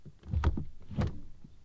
Changing gear without engine running from inside the car.